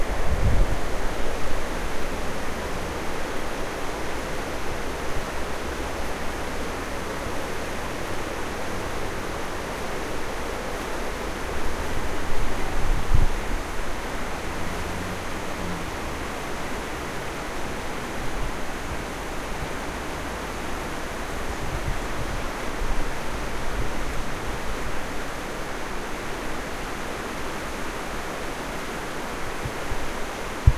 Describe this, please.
Kelvin Bridge Underpass
Recording of the River Kelvin in Glasgow. Microphone was positioned on top of a fence from the path that runs alongside if at Kelvin Bridge
Recorded on an iPhone 4S with a Tascam iM2 Mic using Audioshare App.